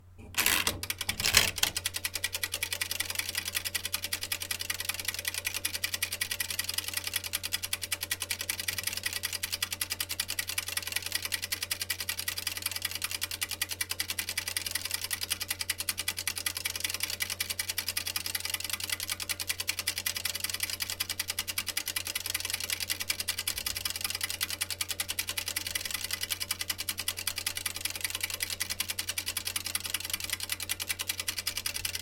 washingMachineCoupleTimers mono
Old soviet washing machine "Сибирь-2" ("Siberia-2") two timers. Start first timer, start second timer, ticks, stop second timer, stop first timer. Loud clicks it is timer tripped.
mechanical-timer, soviet, USSR, laundry, timer, washing, washing-machine